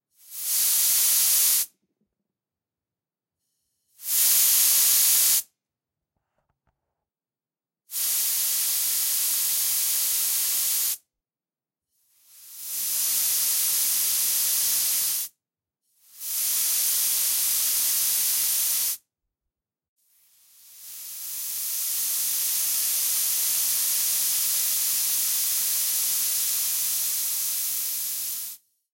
High pressure air. Different lengths. Tascam DR-100.